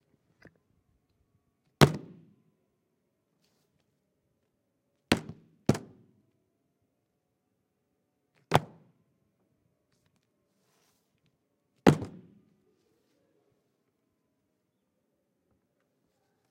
Boom Folie HittingTable
Hitting a table.
hitting, punching, table